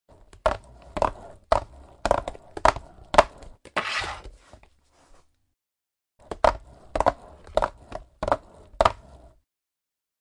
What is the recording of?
FX Skates 1
Actual roller skates recorded on a cement floor.
cement, skates, floor, roller